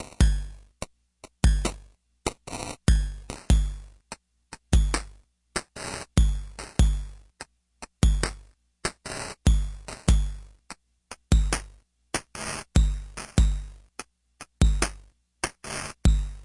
A 4 bar loop at 72 BPM. Created with an old Boss drum machine processed through a Nord Modular.
beat, digital, drum, glitch, loop, percussion